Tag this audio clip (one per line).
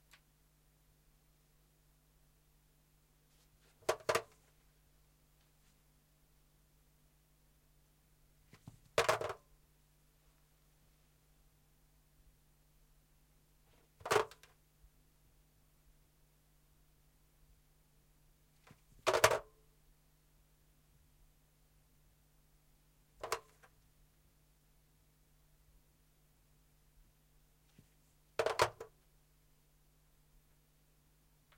dual
close
phone
studio
fostex
rode
answer
home
distant
telephone
channel
office
light
pov
picking
perspective
unprocessed
up
mono
answering
foley
pick
akg
hanging
lightweight
hang